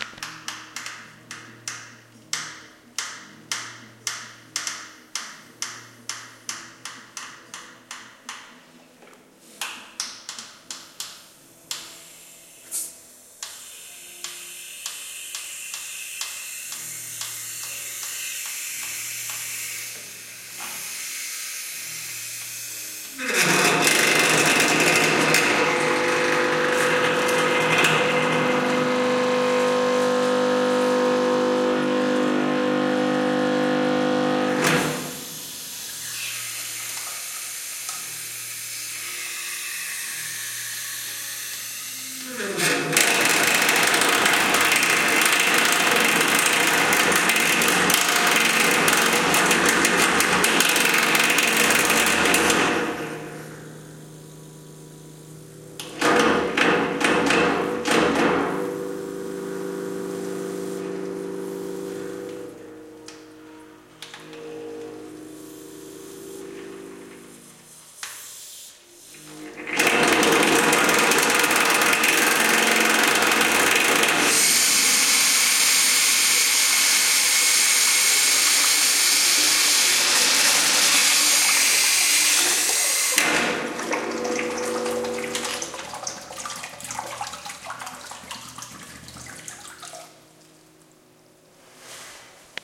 Field-recording of the water closet rinsing system at ESART, Escola Superior de Artes Aplicadas do Instituto Politécnico de Castelo Branco.
This piece was performed by Tiago Morgado, who controlled the water tap.
Recording on a Olympus LS-10 by Than.
resonance, special, loud, noise, fieldrecording, resonator, water